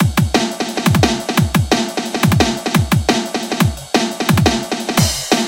DV Amen Break (175bpm)
I decided to make my own version of Gregory Coleman's Amen Break. I sped this version up to 175bpm.
It would be nice if you sent me a link to any production that you've used this break in. I'd just like to hear how creative you all are with it.
Neither Richard L. Spencer (Lead vocals and sax player) who wrote the arrangement or G.C. Coleman (the drummer) received a penny in royalties for the use of the Amen break.